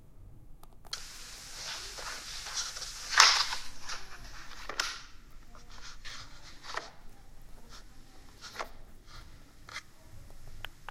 Sonic Snaps GEMSEtoy 30
sonic, sounds